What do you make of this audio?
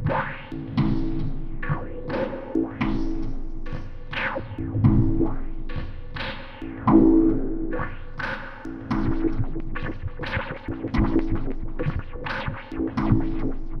filtered machine rhythm of loop
filter, loop, sweep